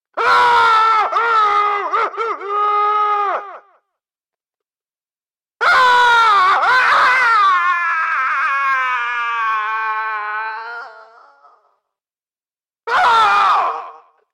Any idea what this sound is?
man screaming
Man yelling on megaphone as he is being attacked.
If you use this audio I'd love to see the finished product.
man, attacked, yelling, shout, megaphone